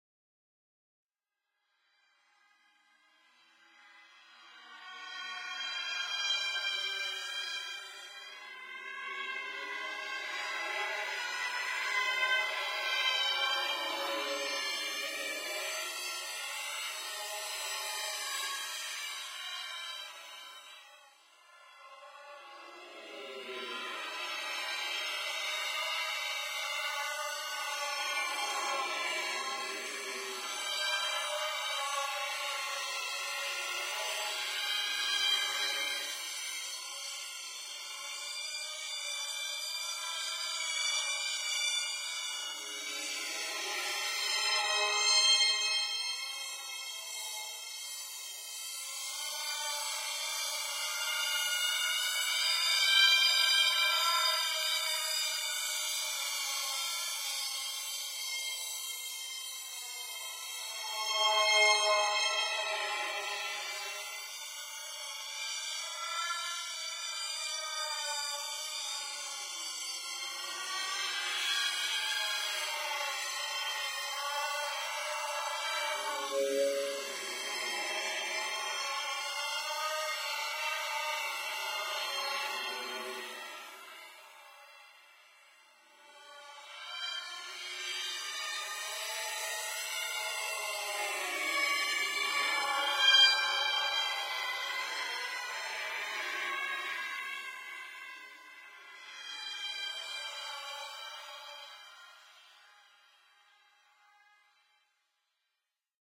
07 chant bunker (06 reverse, harmonic adder, reverb)
strange sound design. Sixth step of processing of the bunker singing sample in Ableton.
abstract, effect, freaky, future, fx, High-pitched, reverb, sci-fi, sfx, sound-design, sounddesign, soundeffect, strange, weird